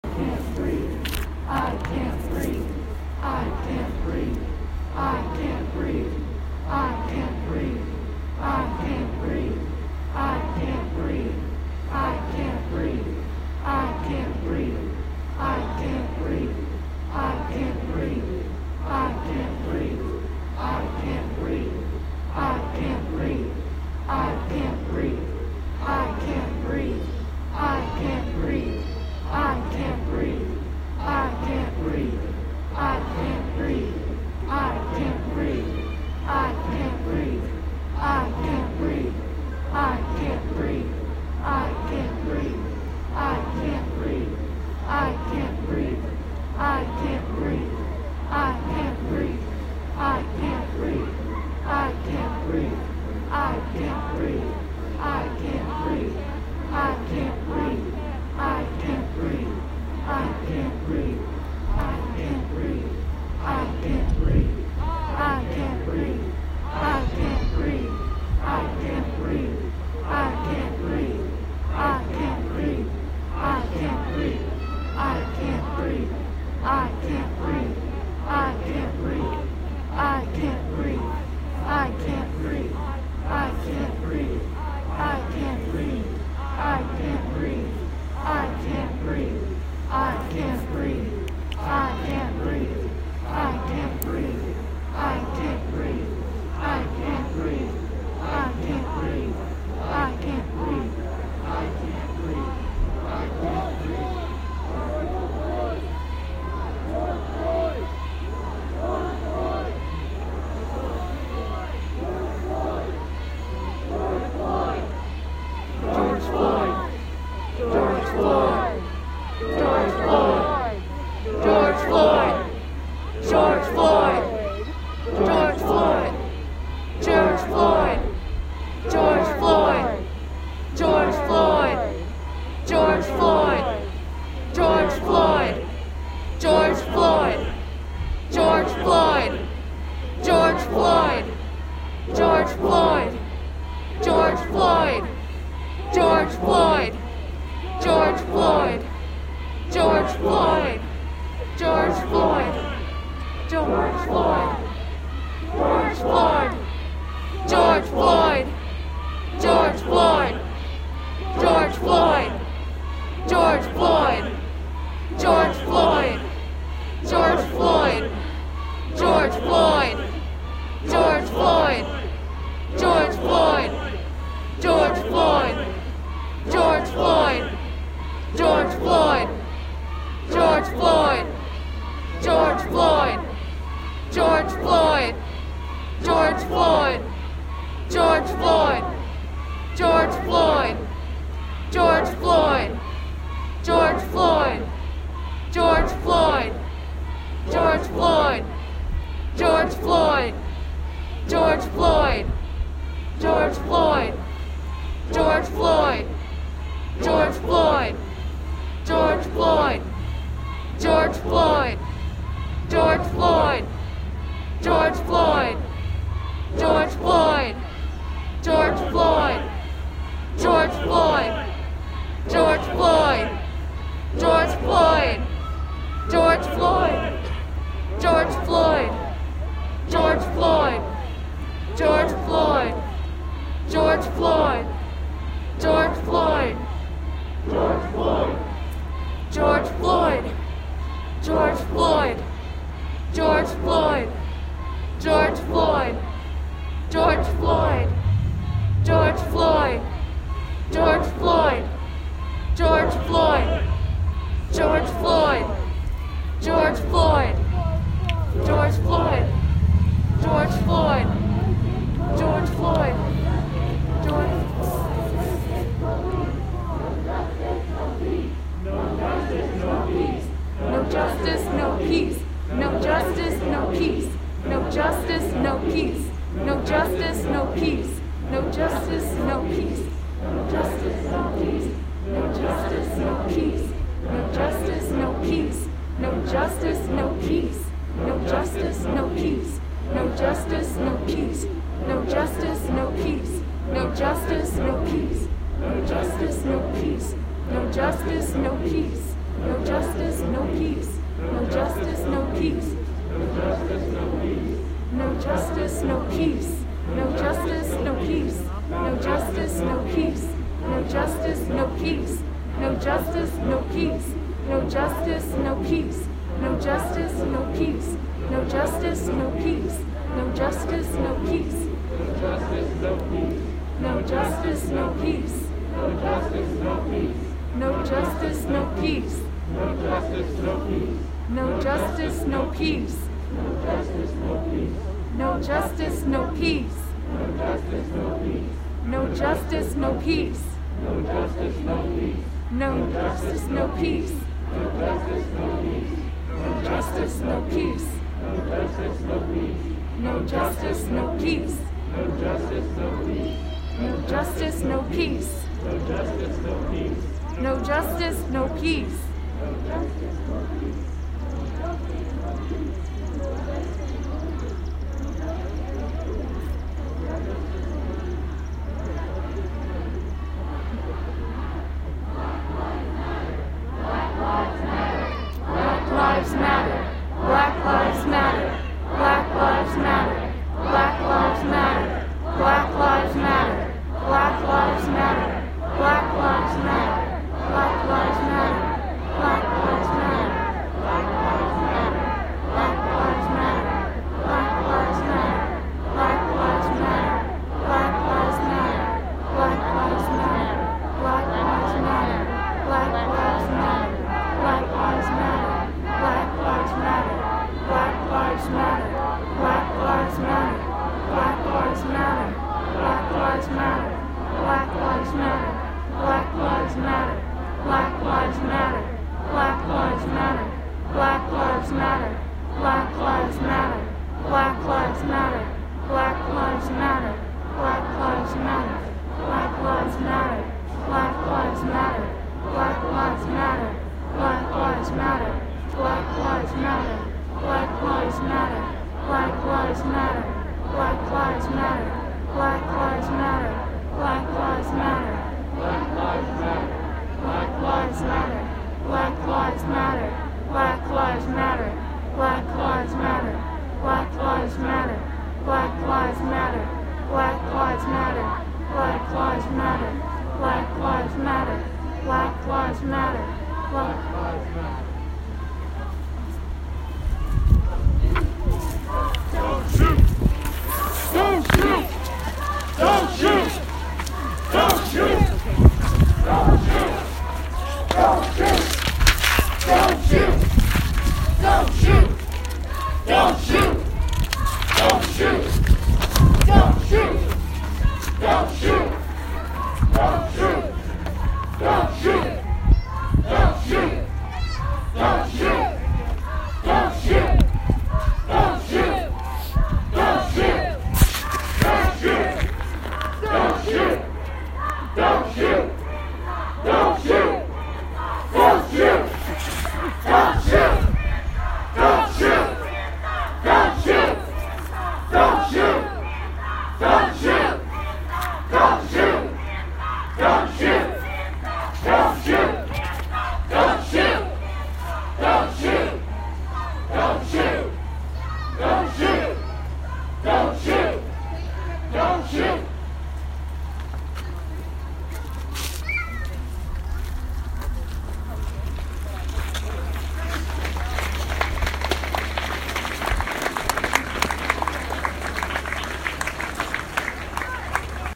June 3 2020 30421 PM black lives matter
Black Lives Matter rally in Kennebunk, ME on June 3, 2020 with about 150 participants lying face-down in a die-in with face coverings on, mid day. You can hear both close up and distant chanting in the crowd.